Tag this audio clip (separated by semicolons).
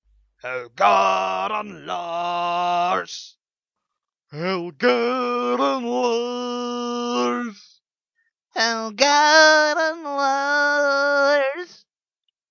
drunk; pub; bar; singing; sing; song; rowdy; alcohol; beer